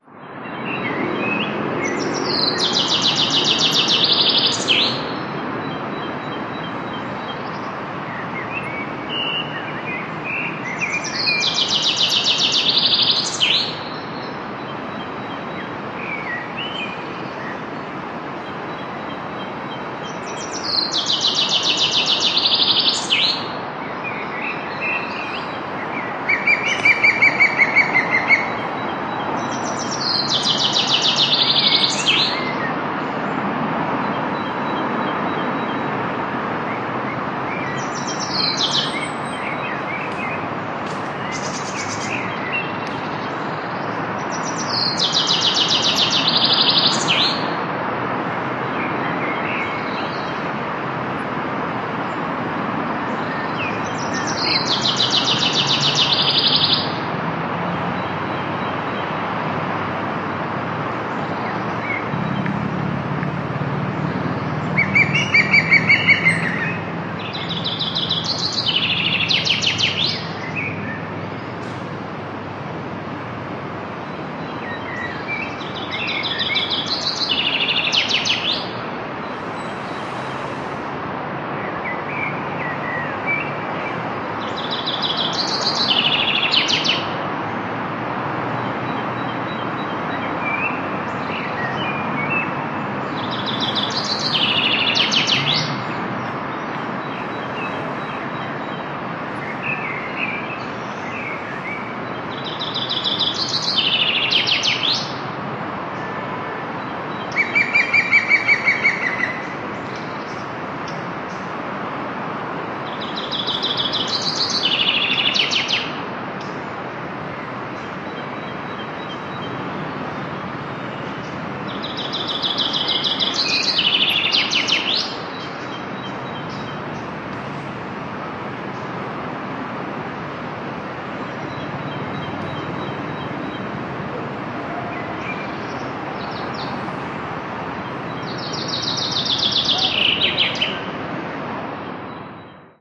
The La Cambre park and forest just outside Brussels atmosphere on this spring evening. Equipment note: Nagra Ares-M recorder with NM-MICS-II XY stereo mic mounted. Some low end roll-off EQ, noise filtering and limiting applied.
atmosphere, bird-song, field-recording, outdoor, park